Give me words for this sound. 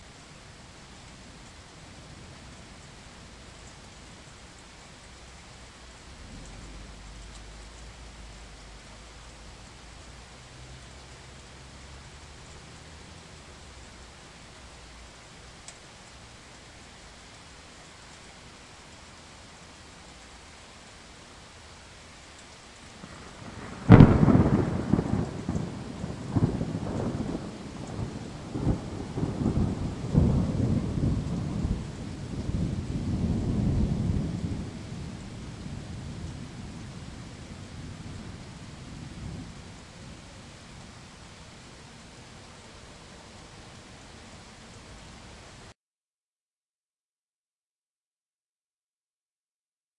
Thunderstorms in Berlin, rain with thunder, lightning, recorded with Zoom H2